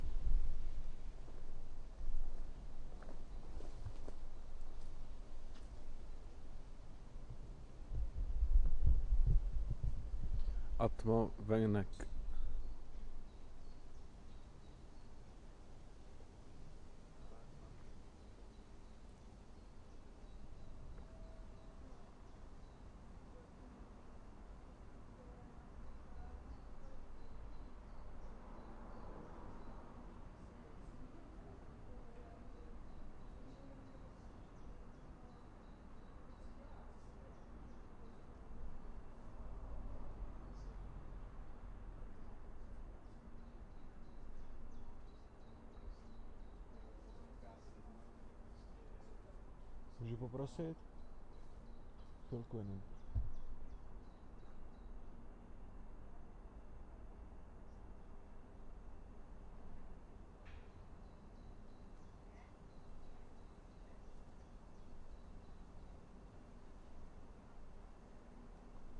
atmosphere - exteriour hospital
Atmosphere recorded in front of hospital in Brno (Czech Republic).
atmosphere, exteriour, field-recording